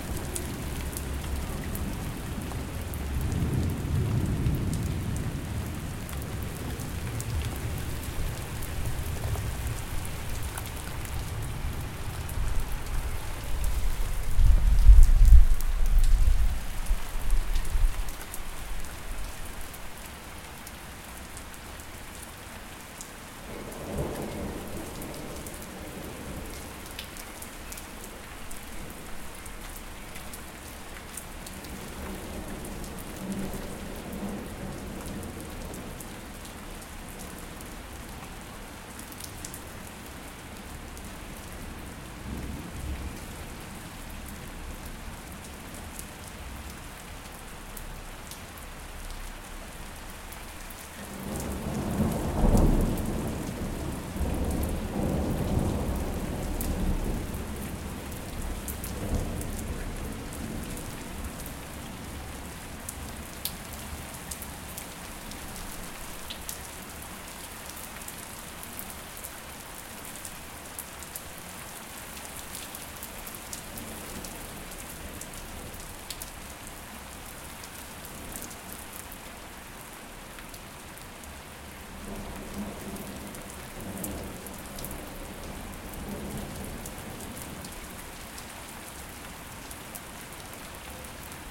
rain near1
near rain record session